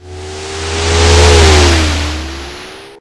Logotype, Race 02
race, game, speed, rush, car, logotype, fast, logo